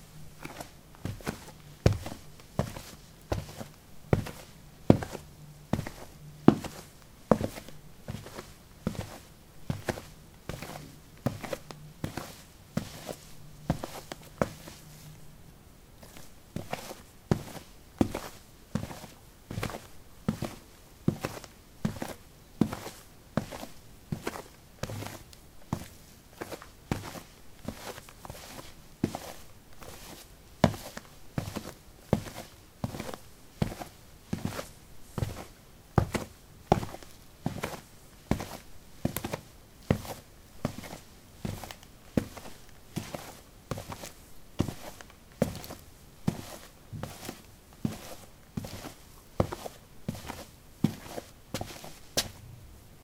soil 12a squeakysportshoes walk
Walking on soil: squeaky sport shoes. Recorded with a ZOOM H2 in a basement of a house: a wooden container placed on a carpet filled with soil. Normalized with Audacity.